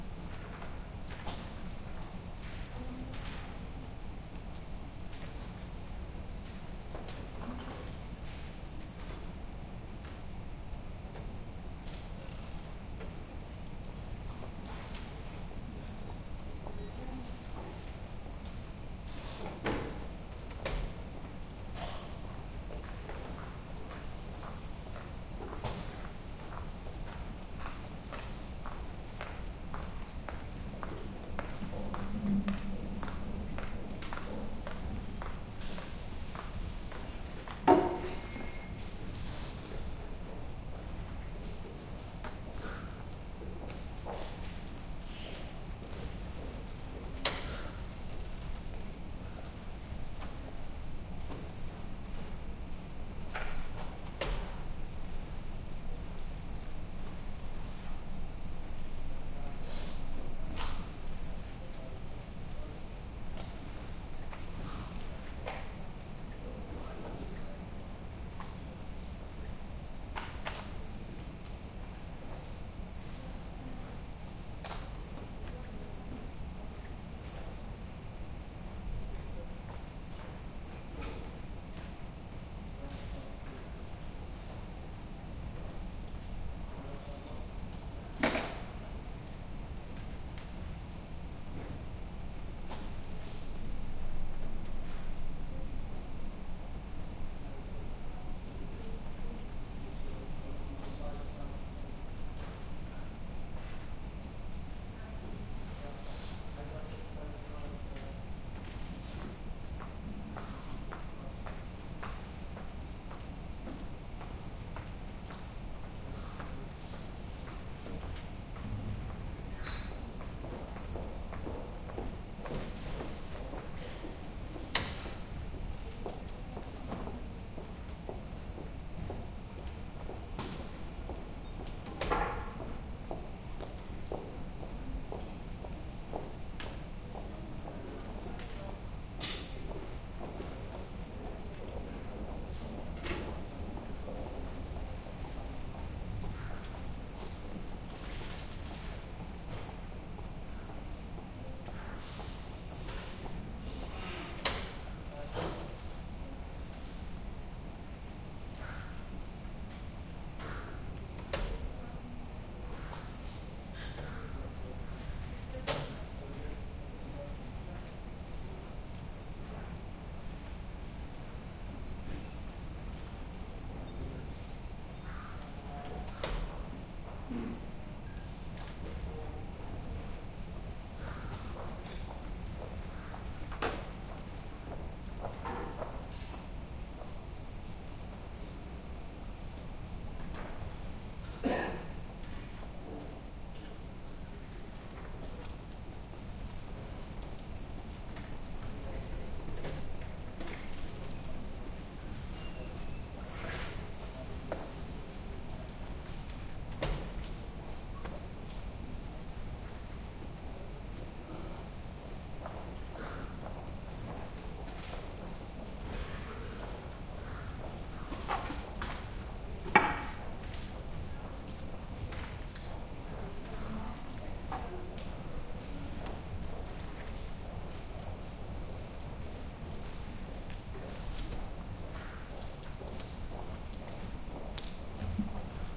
20121112 TU Delft Library - general ambience from upper level
The library at Dutch university TU Delft. Recorded from a high point of view. Footsteps, voices and sounds of doors in the background. Recorded with a Zoom H2 (front mikes).